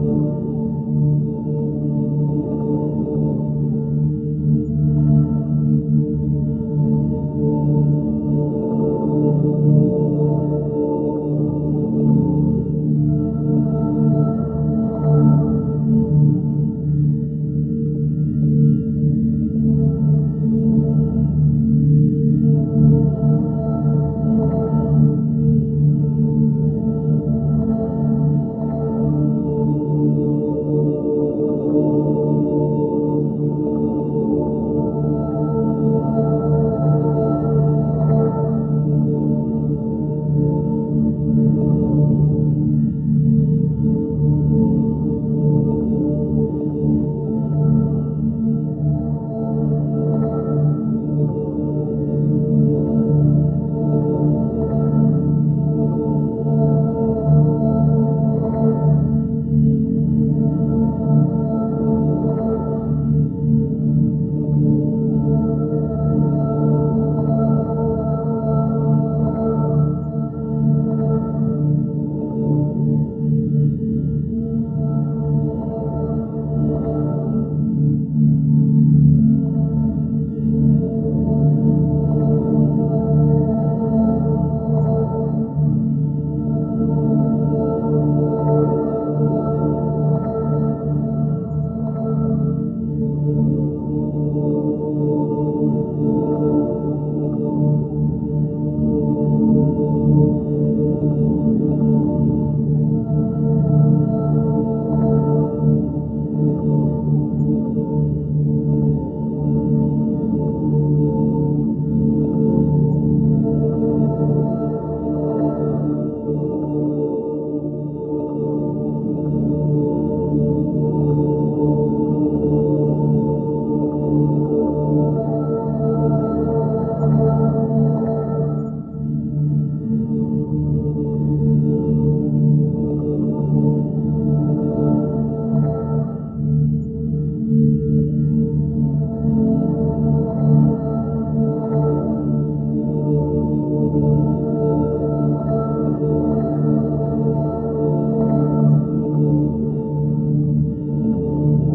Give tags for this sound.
ambience atmosphere sci-fi background chorus choir singing chillout looping loop relaxing music angelic chill-out whale-song soundscape voice choral heaven drone relax relaxed ambient vocal heavenly fantasy angels whalesong voices atmospheric

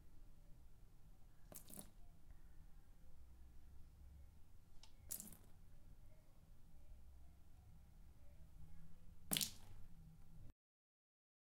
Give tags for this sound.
Floor Wood

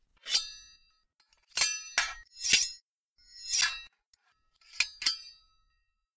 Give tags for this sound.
battle clank clink fight fighting Foley sword swords

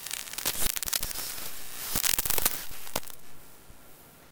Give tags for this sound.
buzz cable electricity fault faulty hiss noise sparking Sparks static

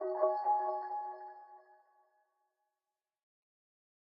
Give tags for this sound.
harmonic chord ui interface warning piano option menu

